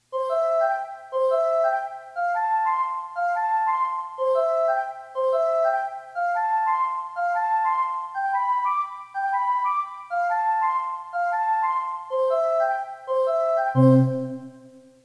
Here's a short jingle recorded from a Yamaha keyboard with Audacity. It be perfect for an episode introduction to a children's program. It's short, simple, pretty catchy and there's something awfully familiar about it.
Thank you.